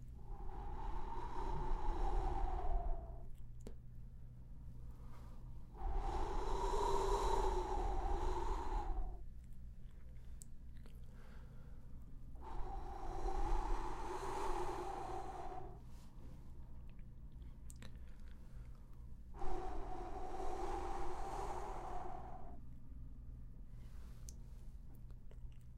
Trying to ambient a forest